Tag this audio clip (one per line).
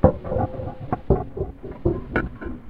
found-sound
loop
household